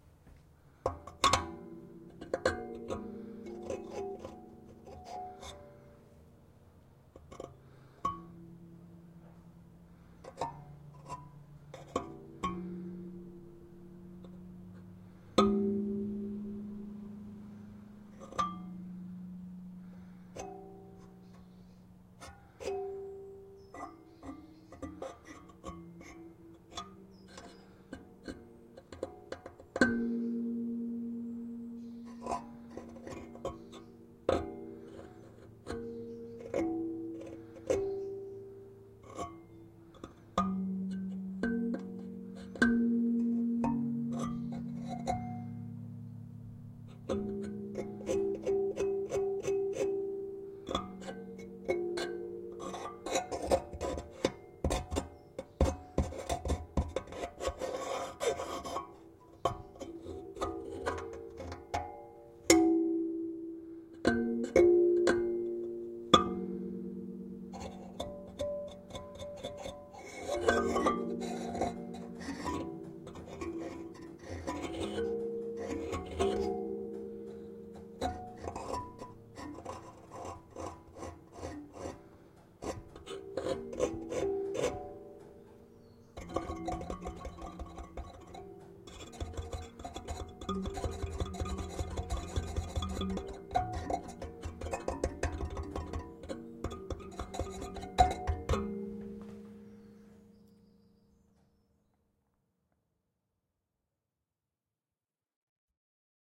kalimba
yvan salomone
african, metallic